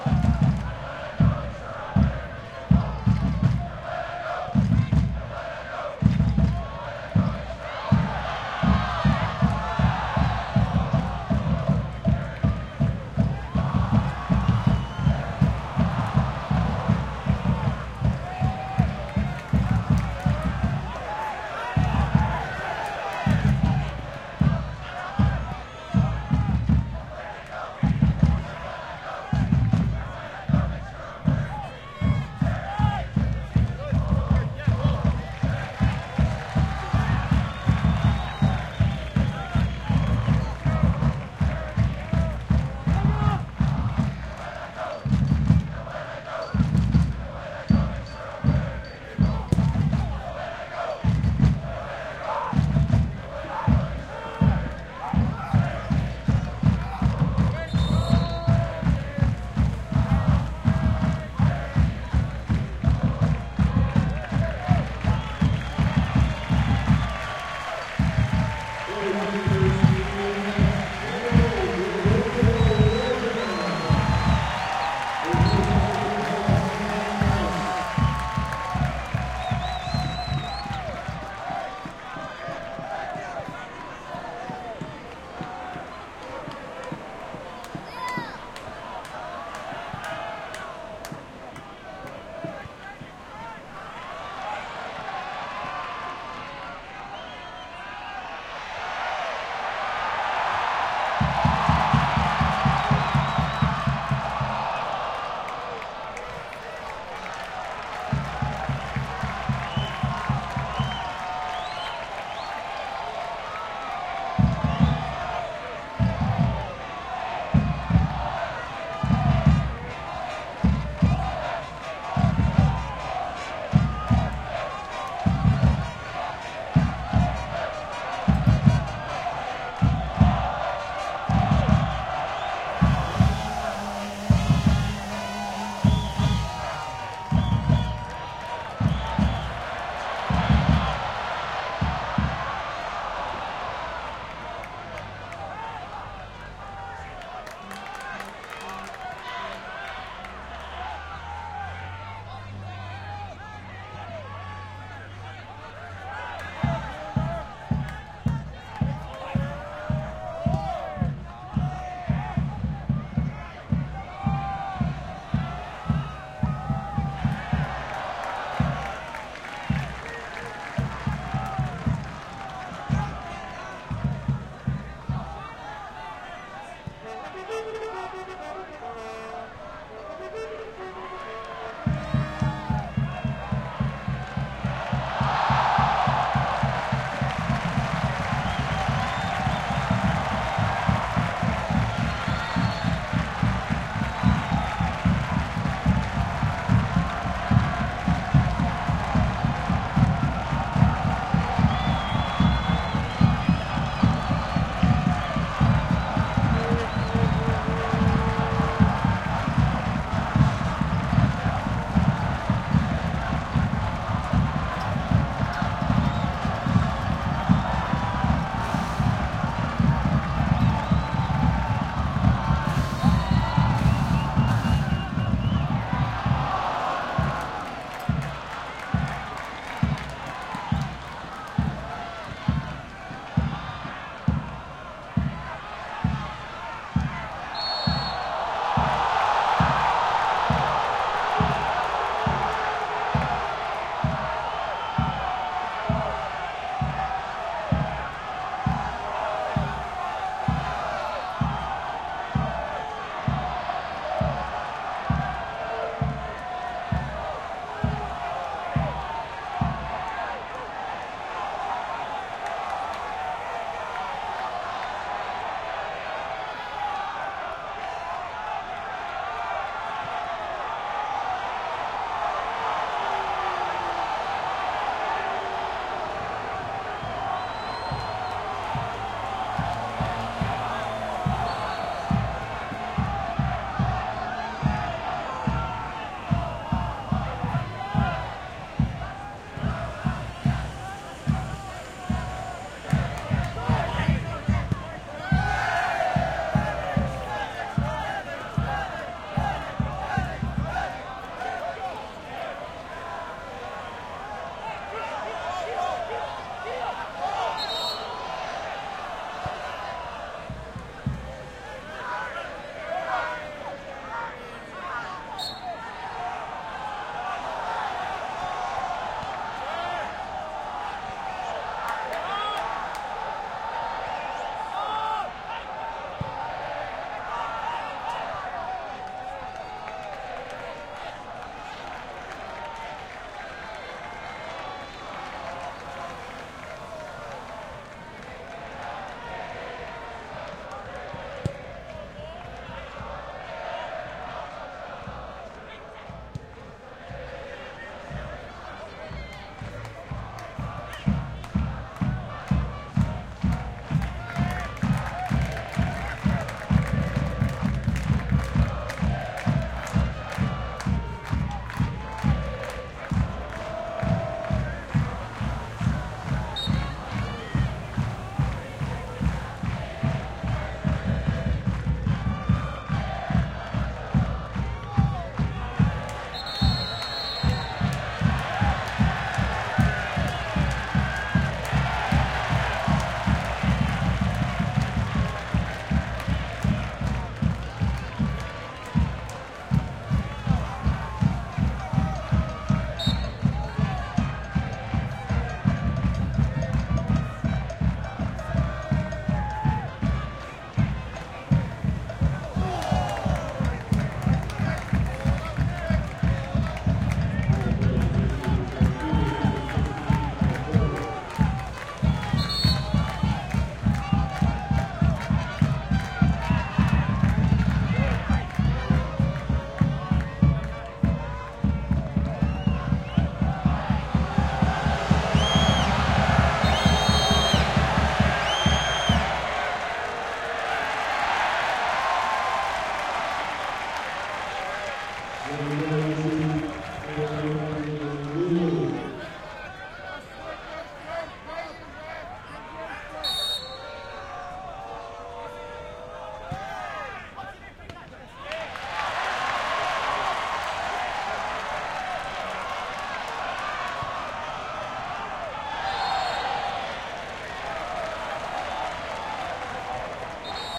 soccer crowd
A soccer game (or football) . The microphones were close to field-level so you may even hear kicking the ball sometimes as well as the players shouting to each other along with the roar of the crowd. Because the one team is called the Timbers, there is periodically the sound of a chainsaw. Recorded with a pair of AT4021 mics into a modified Marantz PMD661.
cheer, crowd, event